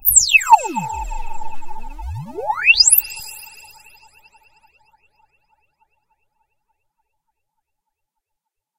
MemoryMoon sweeping-faster
This is part of a soundesign work for the new memorymoon vsti that emulate the legendary Memory Moog synthesizer! Released after 15 moths of development by Gunnar Ekornås, already known for the amazing work on the Arppe2600va and Minimogue as member of Voltkitchen crew.
The pack consist in a small selection of patches from a new bank of presets called "moon mobile bank", that will be available as factory presets in the next update ..so take it just like a little tease.
The sound is a fast hi resonating sweeping synth. Onboard effects, no additional processing.
analog, effect, electro, electronic, fx, lead, moog, pad, sci-fi, sound-effect, soundeffect, soundesign, space, sweep, synth, synthesizer